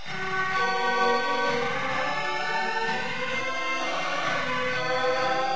Short clip of a Casio SK-8 demo being played through pedals (Boss ME-30 on pitch shifter, Alesis Nanoverb on non-linear (reverse) reverb) and possibly others.
Has an interesting blurred sound... possibly good for looping...